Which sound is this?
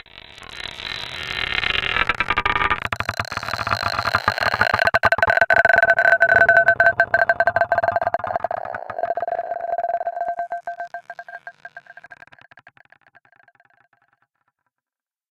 Recorded marble rolling on glass with AKG C1000s for dance piece. Tried to keep roll as slow as possible.